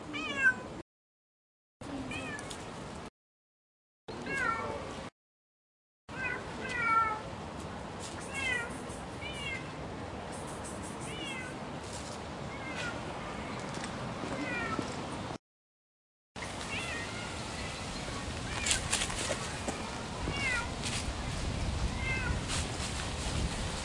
city cat meow
Some records of cat meows.
Recorded at 2012-10-14.
city, meow